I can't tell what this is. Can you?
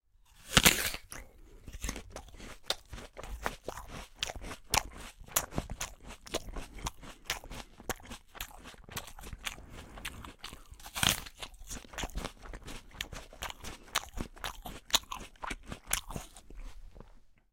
Eating An Apple 02
Me eating an apple
RODE NT-2A
Apple, Bite, Biting, Chew, Chewing, Condenser, Crunch, Eat, Eating, Foley, Food, Fruit, Lunch, Mouth, Munch, NT-2A, Rode, Teeth